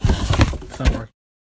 equip-item-handle-cardboard-box
10.24.16: A quick manipulation of an empty cardboard box (formerly a 12 pack of soda) that could be used as an "equip" sound effect.
drum, equip, pack, break, clothes, rip, equip-item, card-board, cardboard, foley